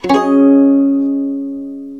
me strumming a violin, recorded on an AKG D-65 into an Akai S2000 sampler around 1995